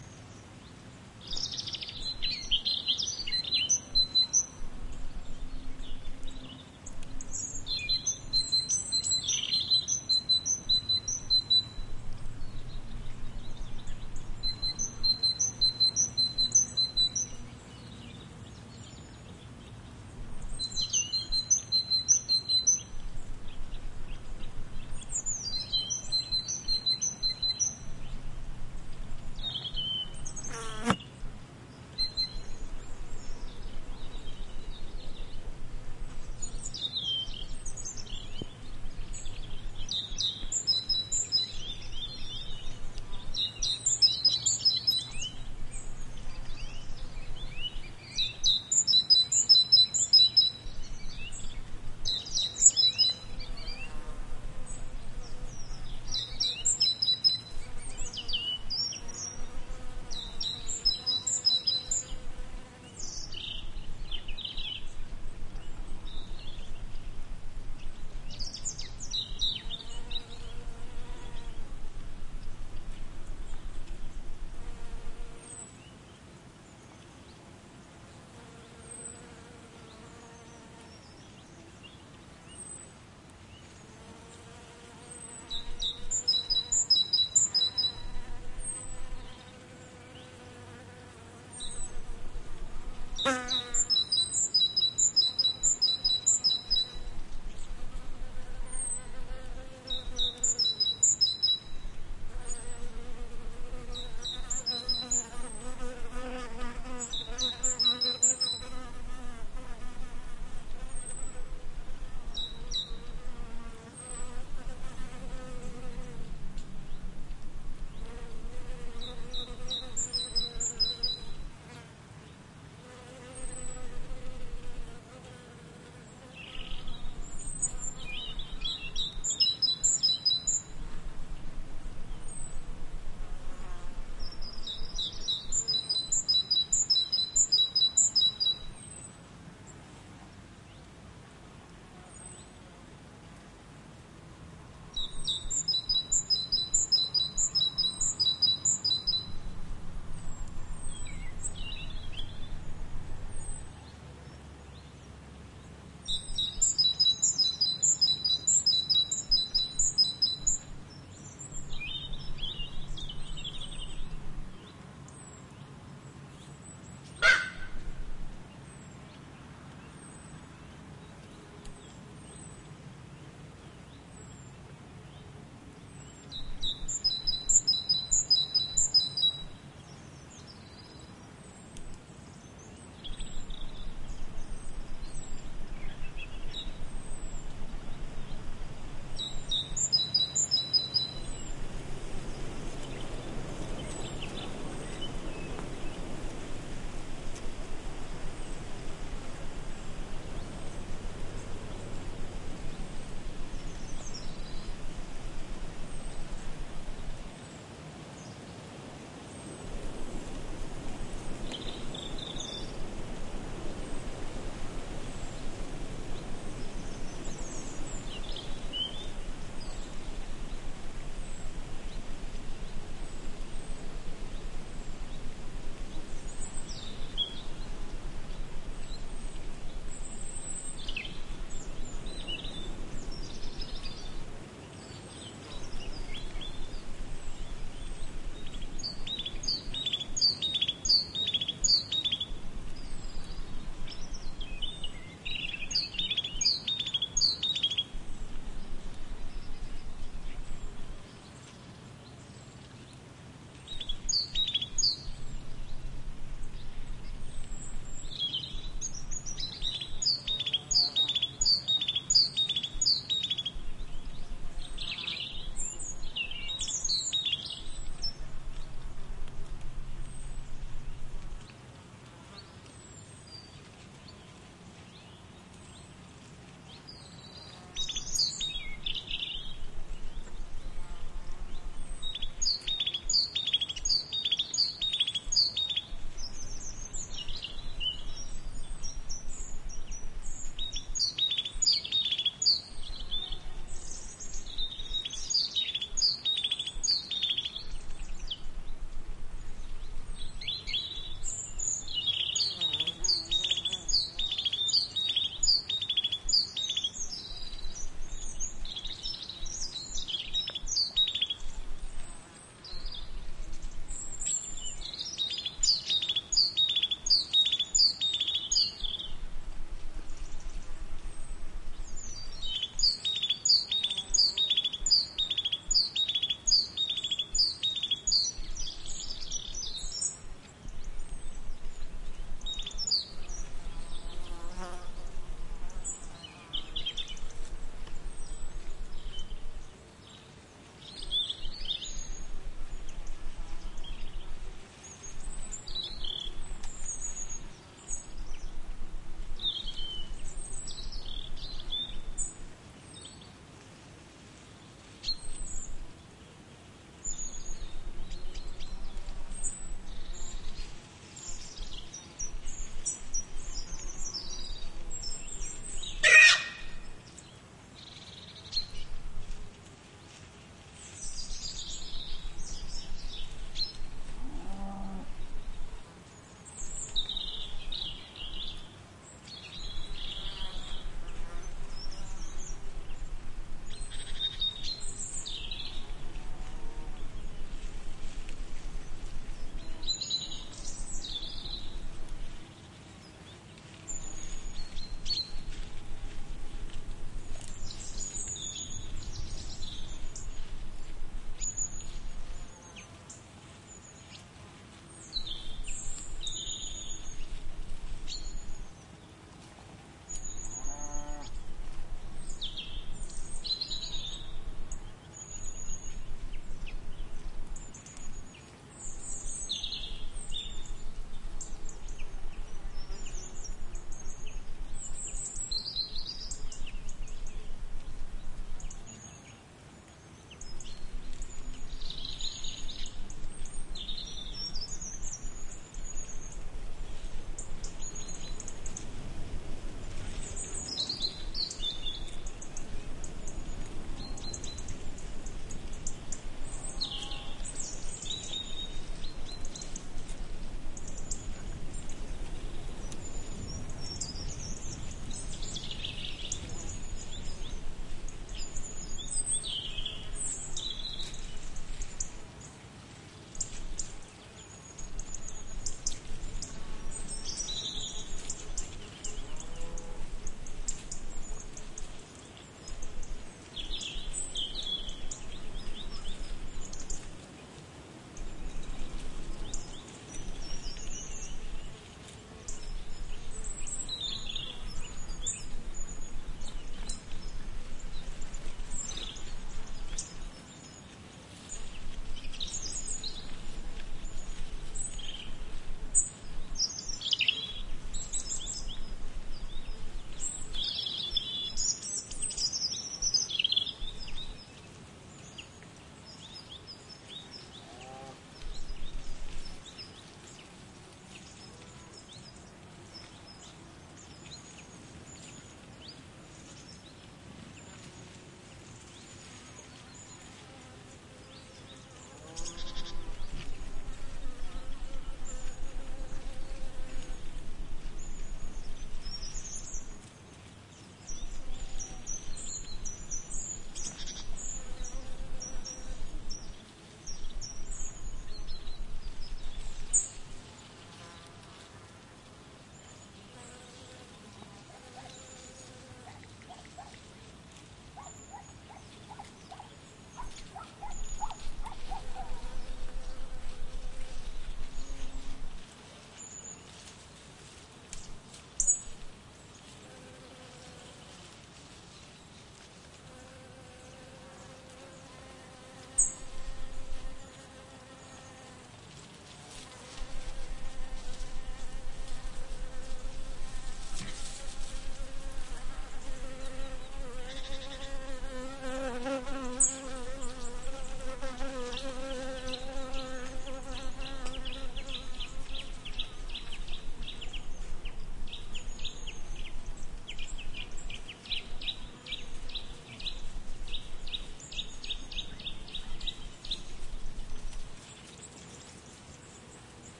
Ten minutes of birds singing (Great Tit, Chaffinch and others) and insects buzzing. Audiotechnica BP4025 stereo mic, Shure FP24 preamp, Olympus LS10 recorder. Recorded in Oak open woodland somewhere near Puerto Lucia, Huelva province, S Spain
autumn, birds, field-recording, great-tit, south-spain, woodland